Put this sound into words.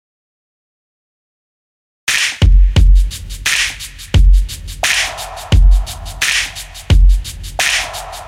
A beat with some effects.